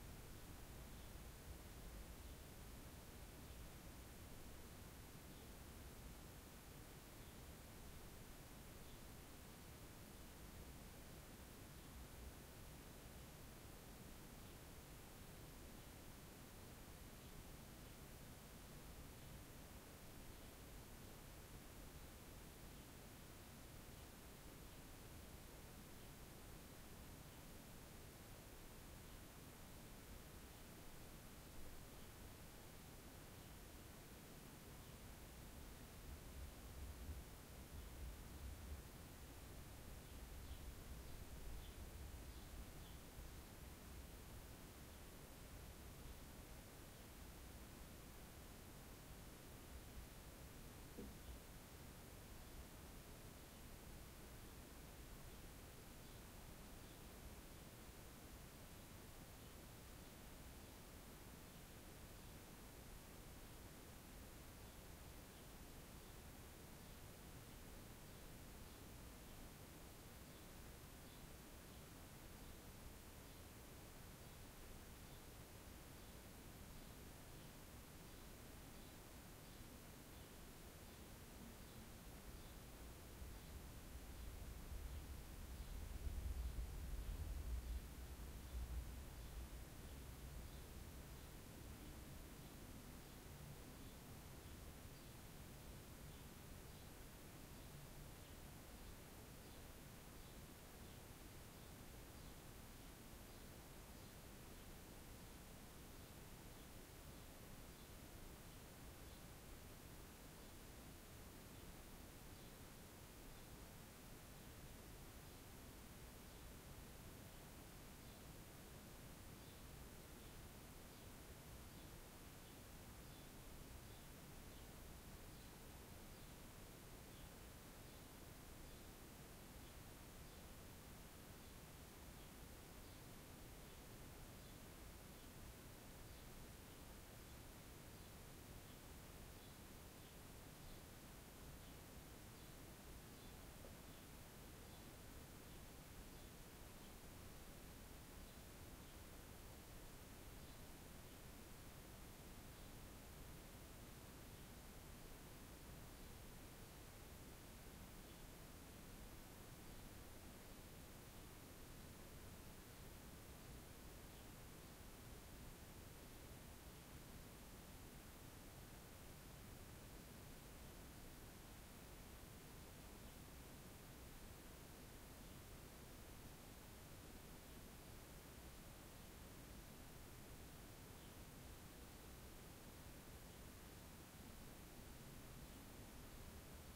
My roomtone 01
My, roomtones